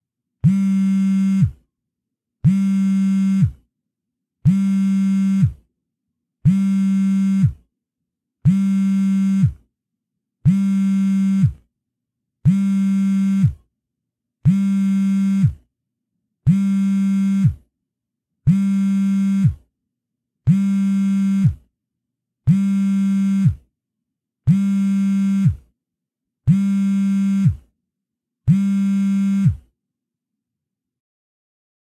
Here's a quick noise removed sample of an HTC Thunderbolt Vibrating while placed on a blanket.